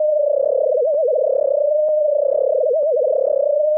Stereo phase effect applied on two sine waves. The result seems to coo.